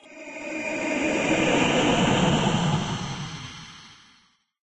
noise ghostly
ghostly, noise, horror